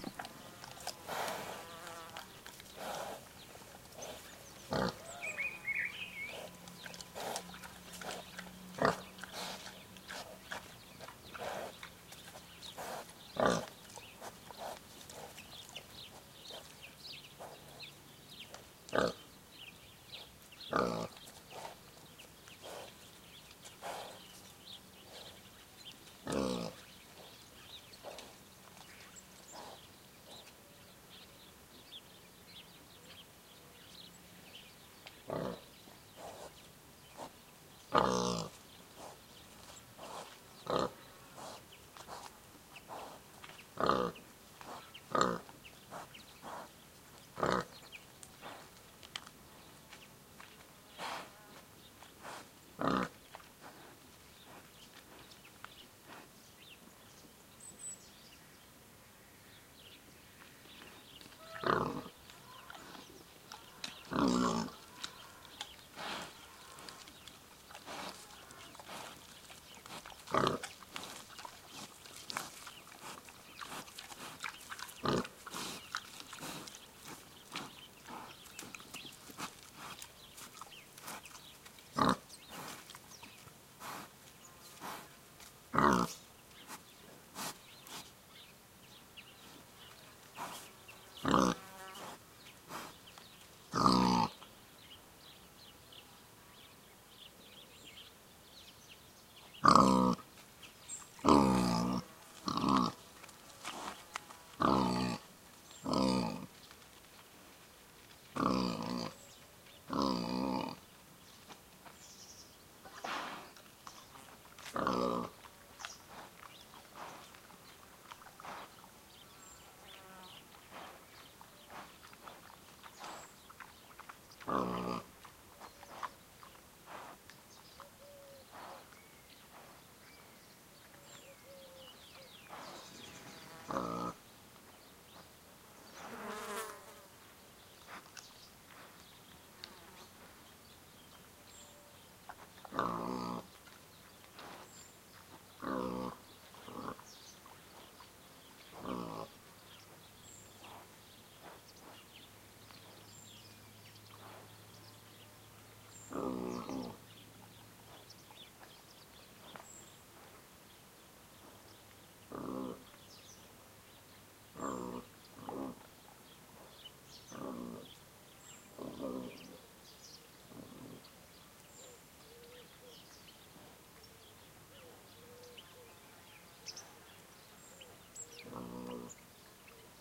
a pig rooting around, chewing and talking to himself. Bird chirps in background. Recorded near Gerena (Seville, Spain) in open woodland with Evergreen Oak. I used a Rode NT4 mic plugged into Shure FP24 preamp, and an iRiver H120 recorder